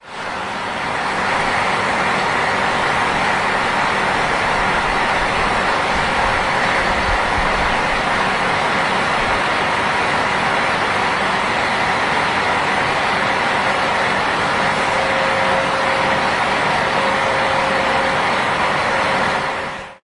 fan in parking100810
about 21.00. the sound made by the fan in the parking in the commercial center Stary Browar in Poznan on Polwiejska street.
the parking is multilevel and that fan is located on 3 level.